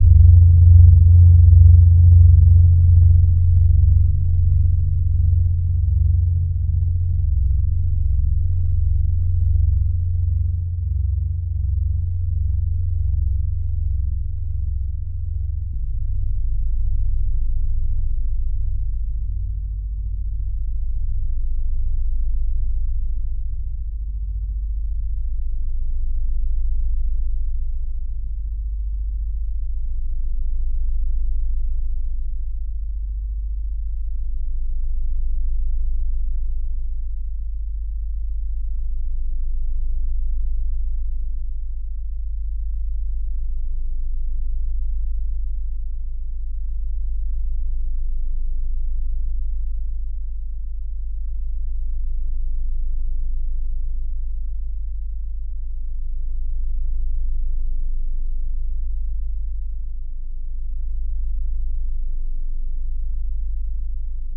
Tibetan bells being struck together Slowed down 32 x
bass, bell, clang, ding, down, drone, metal, metallic, ping, ring, ringing, slow, ting